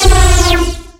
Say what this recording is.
Phasing Beam Variation 03
Used FL-Studio 6 XXL for this sound.
Just modified the "Fruity Kick" plugin and Modified it with lots of Filters,Phasing and Flange effects.
This Sample comes in 3 variations.
Alien-Weapon, Beam, Phaser